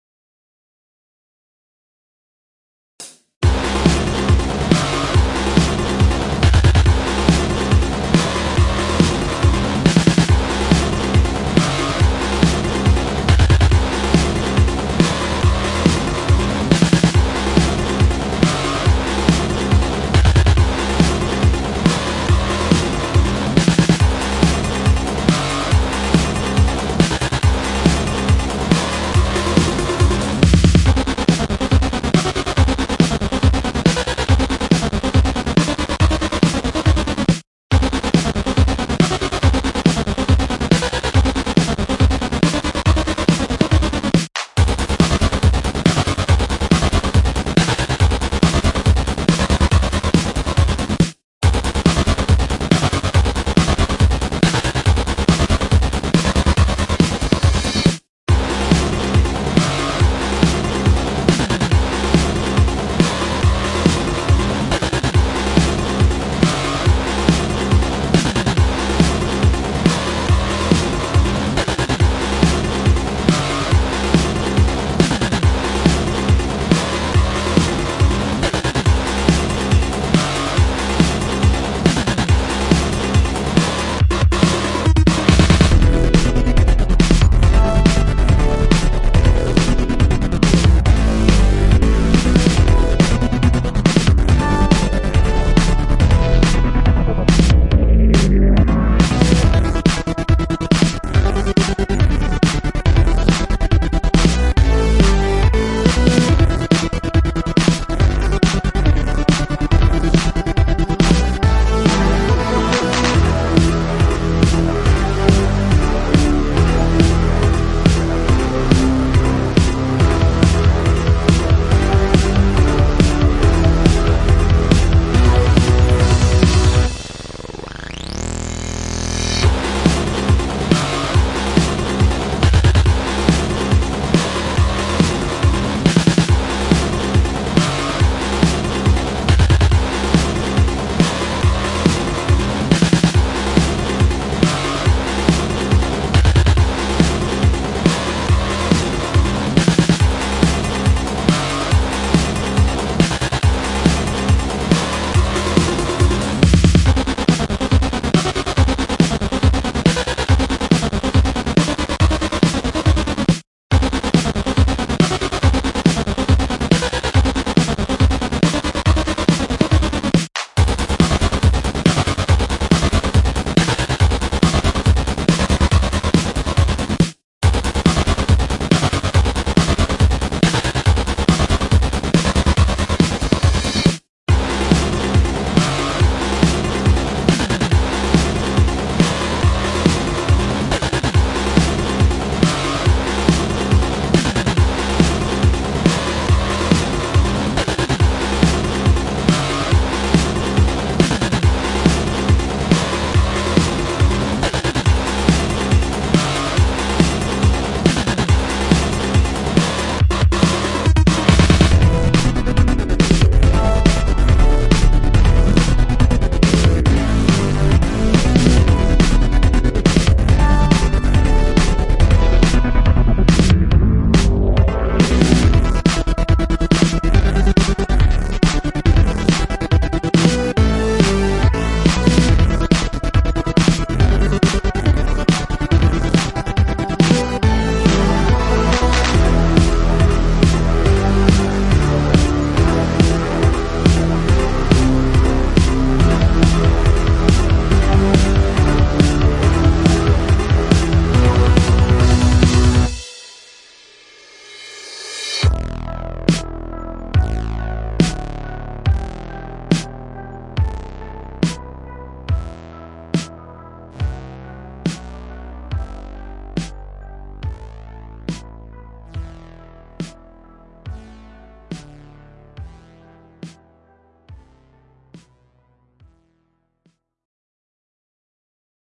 Hello and welcome!
Before creating my game, I've created music.
Unfortunately, it turned out that the created music does not match the atmosphere of the game I'm working on in any way.
If you think that the soundtracks might be useful to you, please use it!
I am 1 dev working on the game called Neither Day nor Night.
Check it out!
(And preferably a link to the Steam or Twitter if possible!)
Enjoy, and have a good day.
#NeitherDaynorNight #ndnn #gamedev #indiedev #indiegame #GameMakerStudio2 #adventure #platformer #action #puzzle #games #gaming
Synthwave, platformer, gamedev, retro, 80s, adventure, action, indiedev, electro, NeitherDaynorNight, games, video-game, indiegame, hotline-miami, synth, song, retrowave, ndnn, 90s, electronic, gaming, techno, soundtrack, miamivice, music